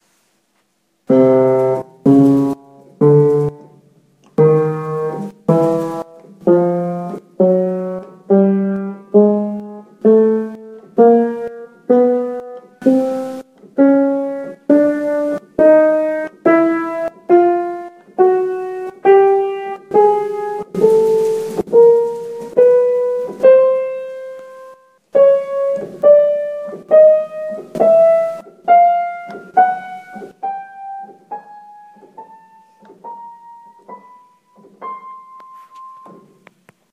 Piano sounds - individual keys as named